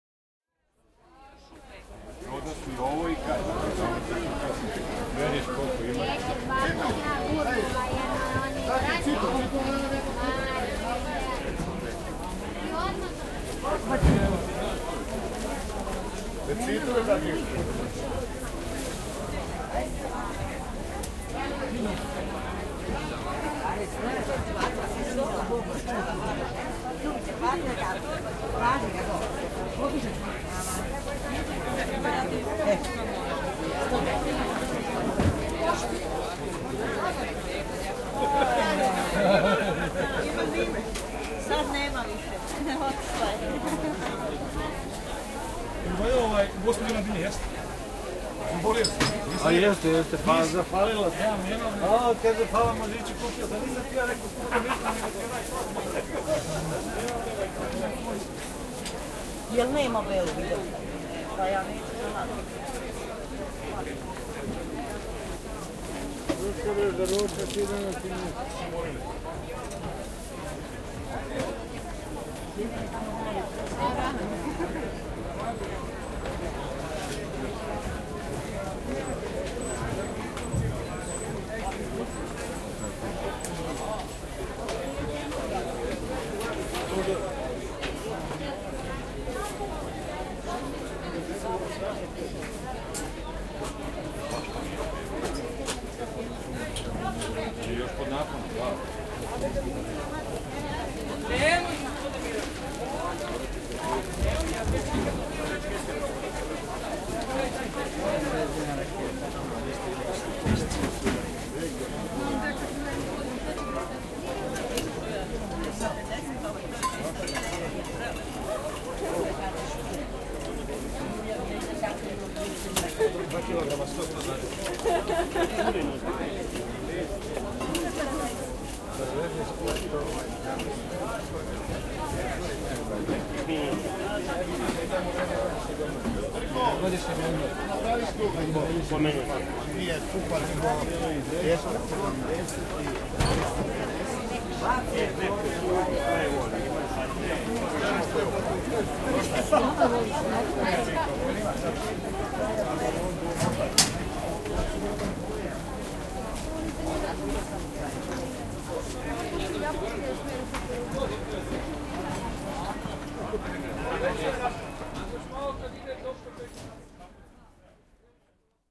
amb market-lively-belgrade
Lively market ambiance in Belgrad, people talking, crowd, field-recording 2010, recorded with Zoom H4n